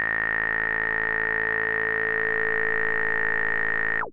Multisamples created with subsynth using square and triangle waveform.
subtractive, triangle, multisample, square, synth